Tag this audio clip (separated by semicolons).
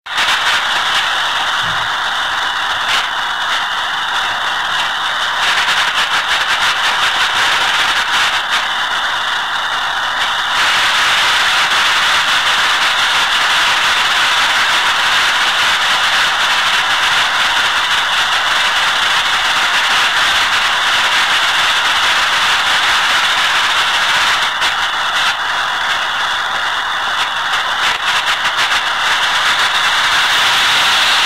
noise; distorsion; ambient; atmosphere; frequency; background-sound; effect; phone; interference